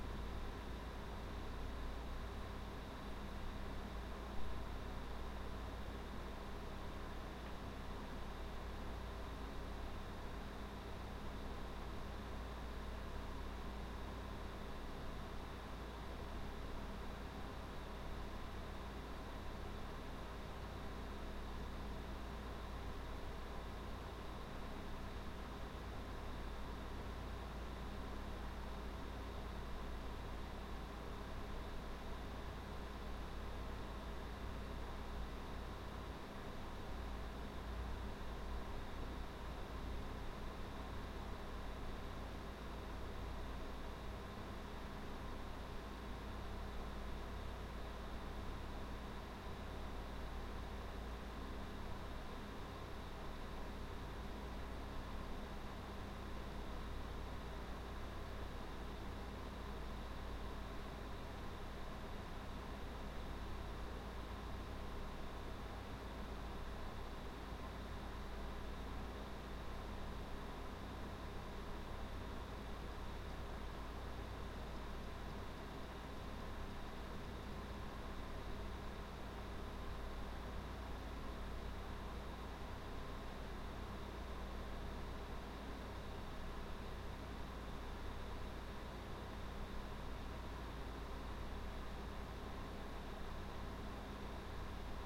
Computer-fan-Tone-Noise-Hum
Computer fan hum with some distant HDD clicketing. Unfiltered. Zoom H1.
Use and abuse this sound for free.